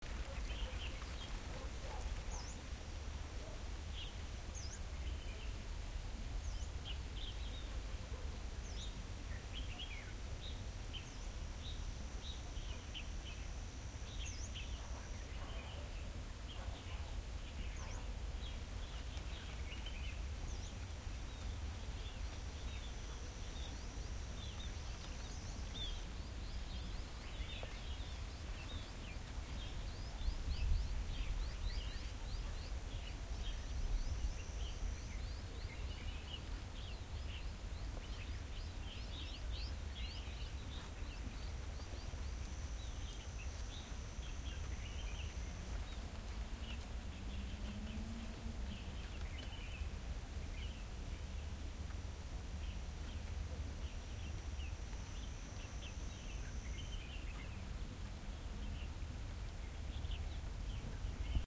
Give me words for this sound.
Stereo recording in a farm on iPhone SE with Zoom iQ5 and HandyRec. App.